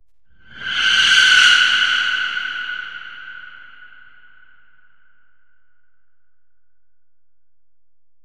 BRAAM-HIGH-3
Entirely made with a synth and post-processing fx.